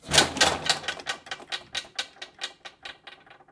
Metallic Rattle Falloff
Tool, Crash